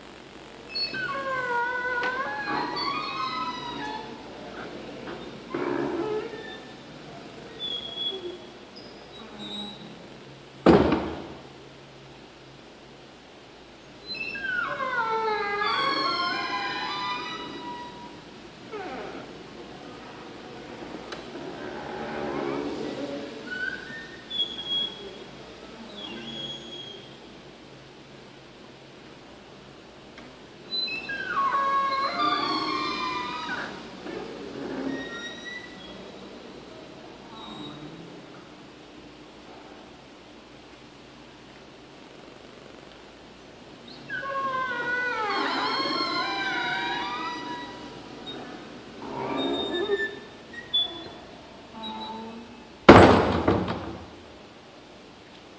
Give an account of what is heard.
Large Wooden Door squeaks-Cartegna
A series of squeaks from an old, large wooden door with iron hinges. There is one slam at the end.